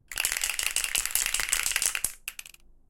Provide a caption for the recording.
Spray Can Shake 5
Various shaking and rattling noises of different lengths and speeds from a can of spray paint (which, for the record, is bright green). Pixel 6 internal mics and Voice Record Pro > Adobe Audition.
aerosol, art, can, foley, graffiti, metal, paint, plastic, rattle, shake, spray, spraycan, spray-paint, spraypaint, street-art, tag, tagging